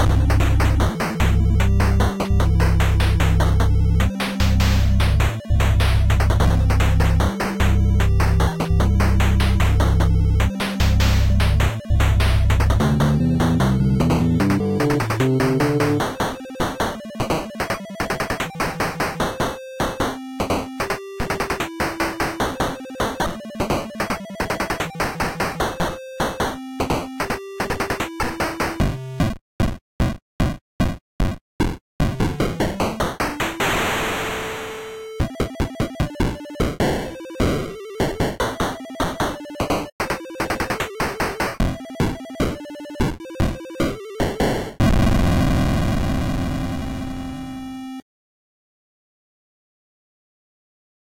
block wave
made with beep box
music, block, beepbox, beats, song